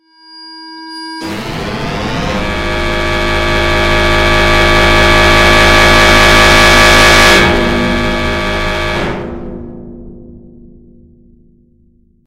dub siren 2 1
A horrifying drill-like effect.